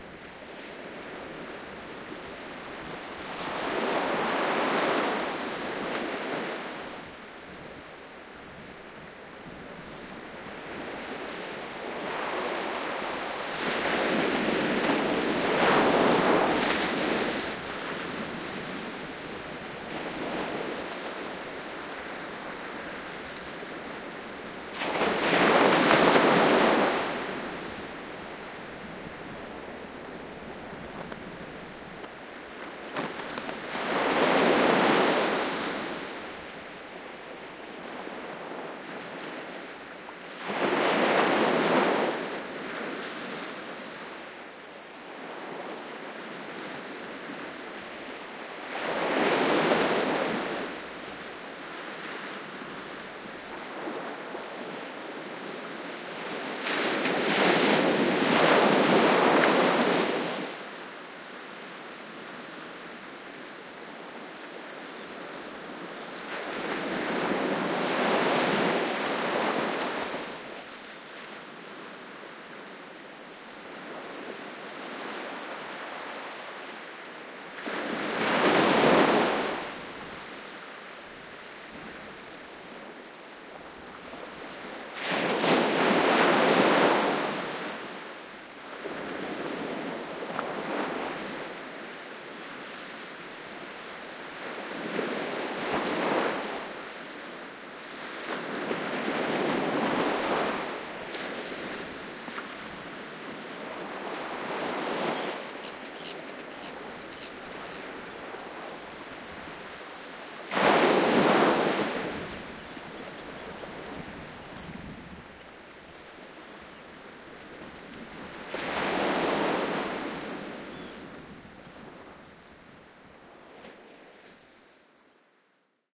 Waves at Shetland Islands 2
I was on the Shetlands Islands for a week. There I recorded the sound of waves in some beaches. Everything comes from the west side of Mainland. Here are a sandy beach.The sound of the waves is a litle louder and contaiens more sounds from the lower frequencies.Here I uses two widecaredioid micrphones and eleminaing the lowest frequenes by a equliser and the microphoneplacement is nearer the waves than a and b that givs a wider stereoimage.
Recorder F4 Zoom
Microphones 2 CM3 Line Audio
Rycote Stereo Baby Ball´s as windshield
Software Audacity Wavelab